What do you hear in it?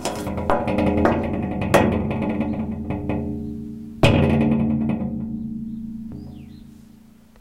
I'm shaking a radiator, it rumbles. Recorded with Edirol R-1 & Sennheiser ME66.